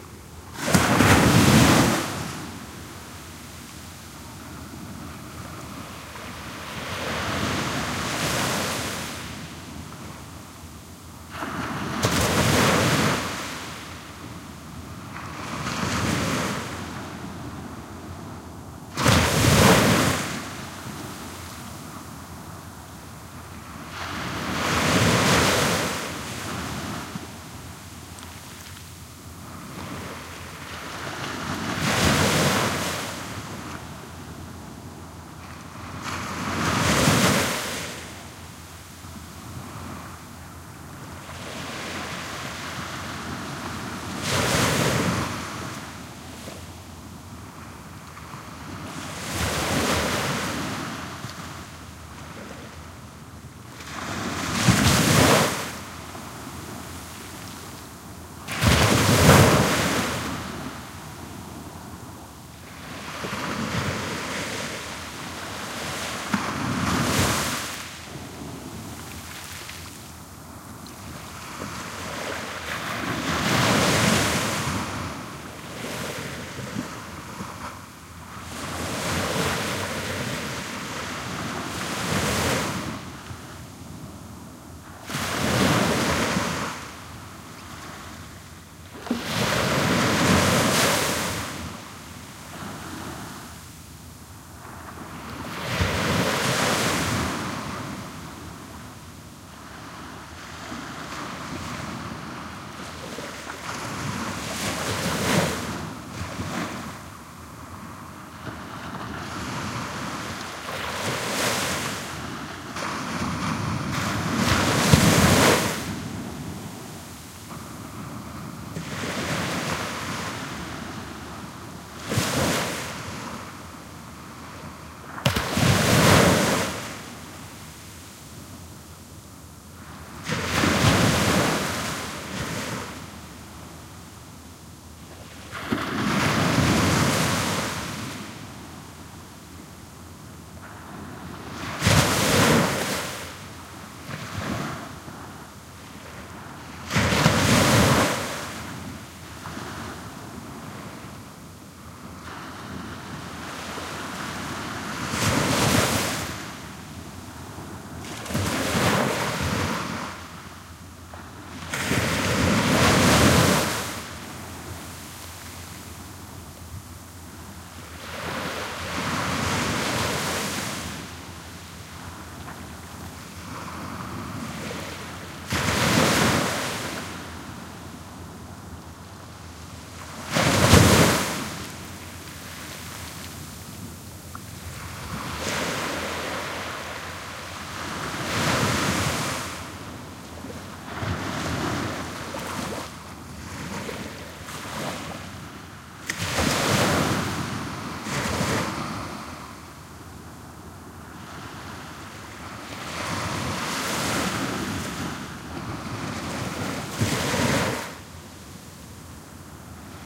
Waves splashing on a sandy beach. This sample is unusual in that the swell was strong but there was no wind at all. As a result, moments of relative quietness - during which you can hear sand moving, foam bursting, and very distant waves - emphasize the crushing of waves. This pattern gives (at least to me) the sensation of a big space around. Recorded near Punta del Moral, Huelva (Andalucia, S Spain) using a pair of Primo EM172 capsules (without windscreens, one over my chest, the other on my back), Fel preamp, and PCM M10 recorder.
beach, field-recording, ocean, sea, seashore, splash, surf, water, waves